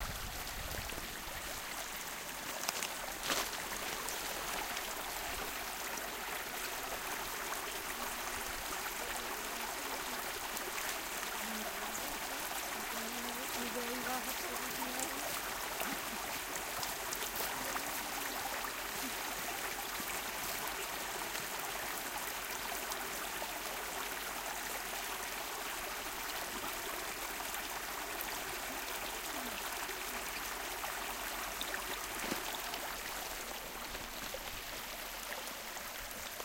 sound of a small creek near Igaliko, Greenland
field-recording, stream, water